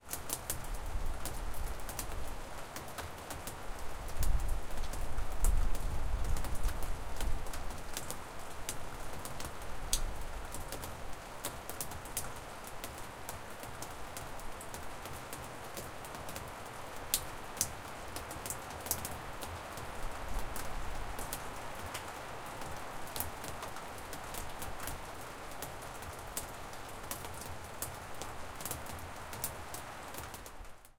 Rain drops on wooden porch.